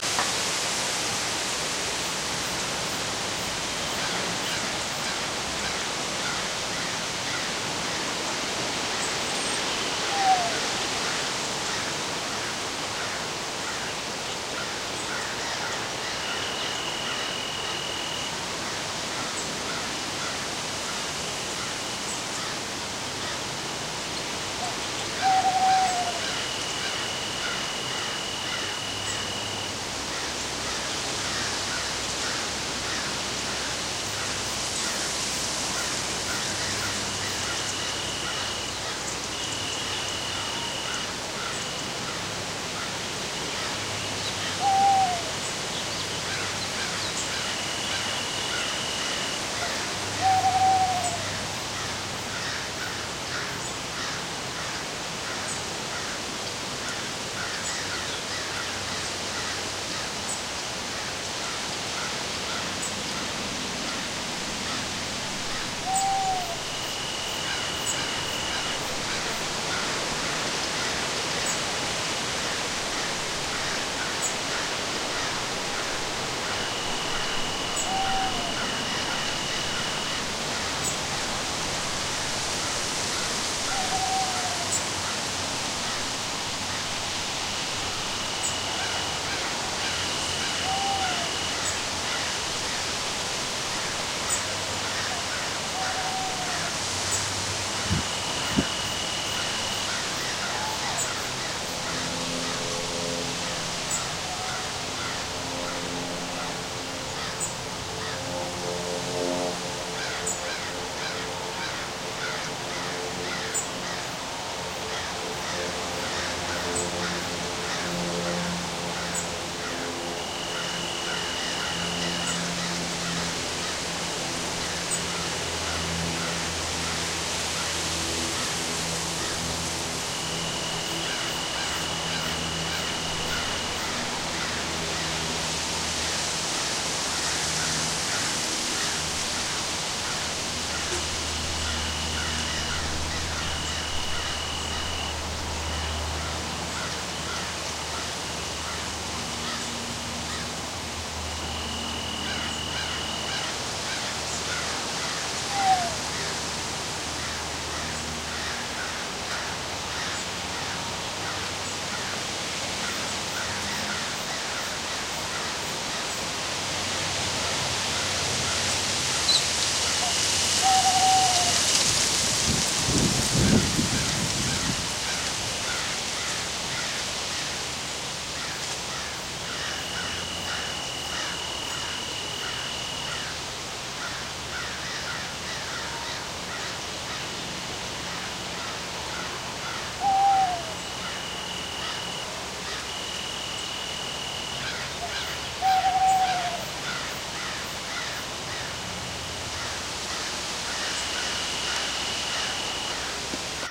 Just a quiet recording of steady, light wind with some birds. There is a highway nearby so you will hear that at some parts also. Hope someone needs this!